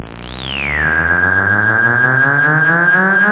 LongCharge TB-303 01
maked TB-303 clone.
electoric, button, techno